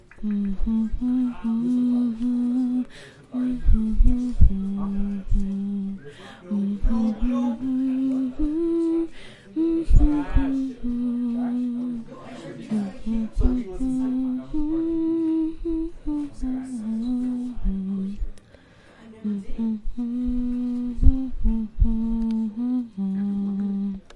Grace hums "You are my Sunshine"